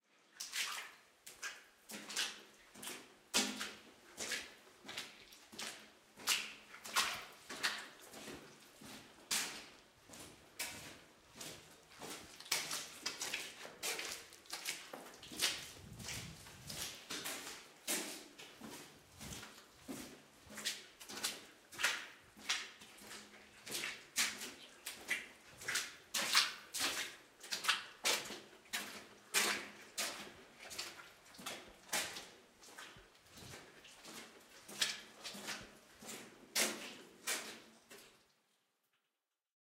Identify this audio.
Pas 1 pers = graviers+boue
One person walking into the catacombs recorded on DAT (Tascam DAP-1) with a Sennheiser ME66 by G de Courtivron.
personn, walking